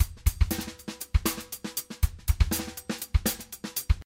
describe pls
funk acoustic drum loops
loops, acoustic, drum, funk